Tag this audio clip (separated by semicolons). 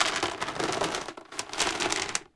102; 102bpm; bleach; crunch; dice; dices; ice; loop; shake